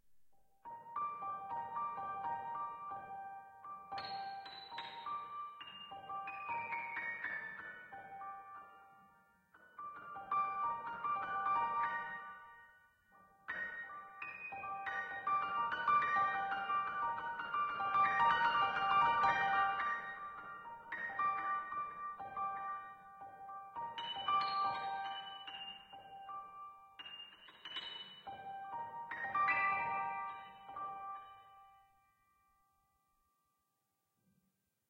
A bit eerie and mysterious sounding suspenseful piano improvisation.